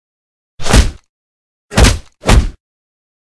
Painful Sounding Punches
3 painful bonebreaking puch sounds. I recorded 3 sounds and combined them to get a puch, then I put the sounds in different order and took some out, to get a different sounding punch. For this sound I used a really cheap recorder called the "Sony T-Mark" and also I used the Audio Technica ATR6550 condenser shotgun mic.
3, painful, punches